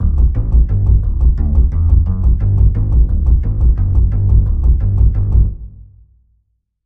Bass seq P101
Bass-guitar processed sequence in C-key at 175-bpm
175-bpm, Bass, C-key, acid, bass-guitar, beat, guitar, key-C, loop, processed, sample, sequence